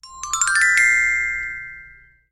Xilofono siendo tocado
Sonido-xilofono, Xylophone, Xilofono